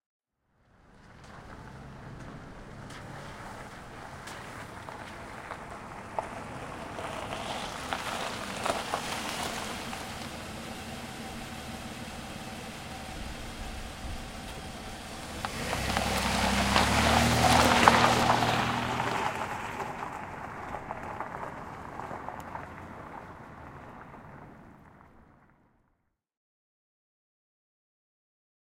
08 hn carupgravel2
Van pulls up on gravel, stops, drives off. Wet gravel.